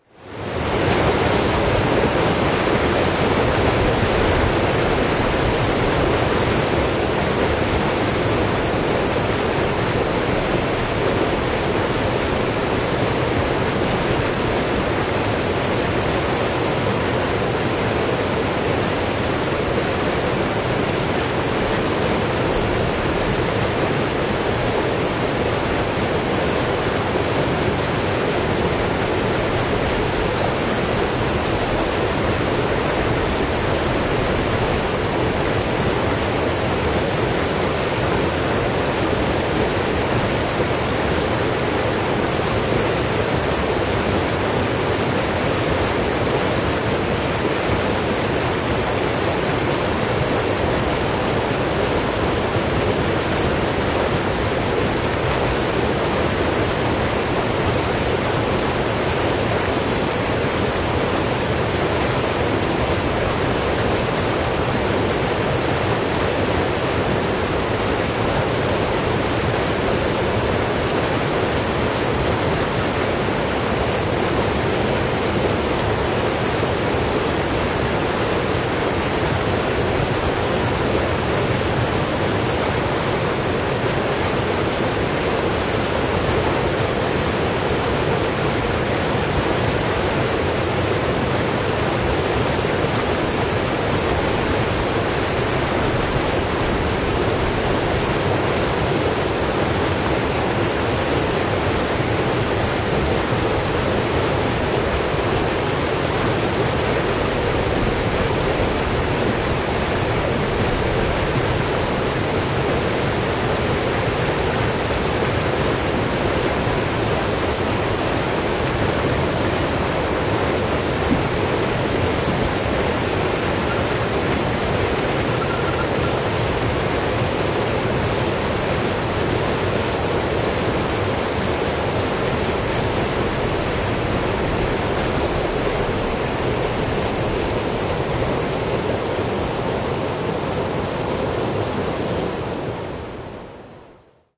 Vesi kohisee ja kuohuu voimakkaasti. Koskipaikka Käkkälöjoessa.
Paikka/Place: Suomi / Finland / Enontekiö
Aika/Date: 19.05.1983